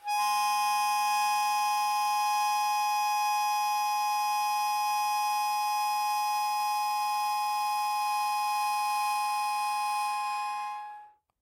Eb Harmonica-6
Harmonica recorded in mono with my AKG C214 on my stair case for that oakey timbre.
eb, harmonica, key